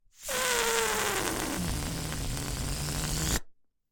Balloon Deflate Short 3
Recorded as part of a collection of sounds created by manipulating a balloon.
Balloon, Deflate, Fart, Flap, Short